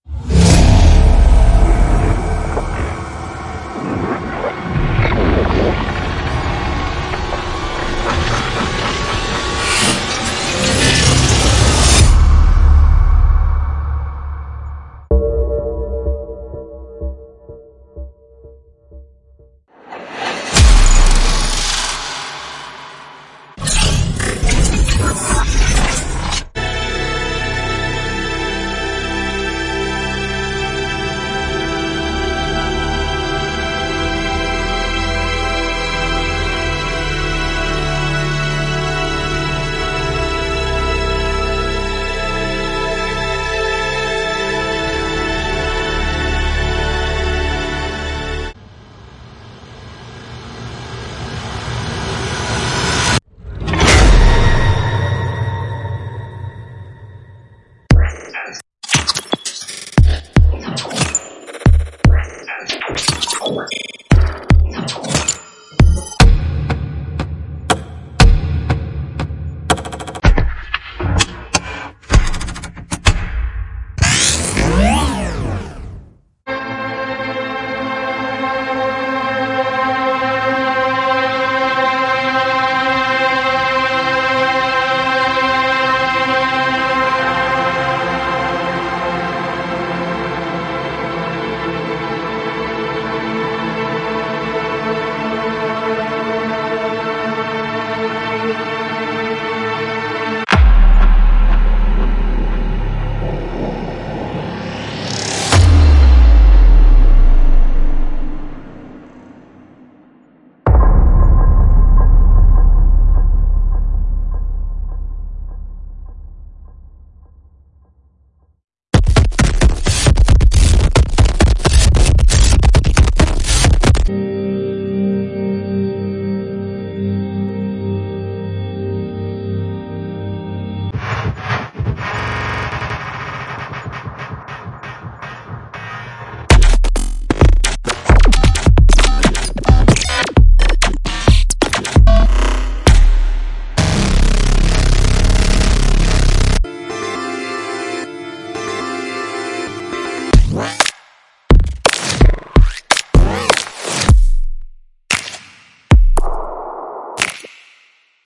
Anthony Baldino Reel Sharing Project
Created by Anthony Baldino for use in the Make Noise Morphagene.
"Somehow making electronic music lead me to creating trailer music and sound design so it seemed fitting to make reel with a wide array of sounds from both types of music. This reel includes repurposed and re-mangled sounds that inspired music and sound design that have found their way into such trailers as Interstellar, Jurassic World , Alien Covenant, Suicide Squad, Star Wars: Rogue One and others as well as glitchy beats and ambiences from upcoming electronic releases. In this reel you'll find impacts, processed orchestra, synth ambiences, modular beats and other noises that will hopefully inspire Morphagene users to explore new music of their own." - Anthony Baldino
ambiences, anthony-baldino, baldino, beats, film-trailer, impacts, mgreel, modular, morphagene, orchestra, sound-design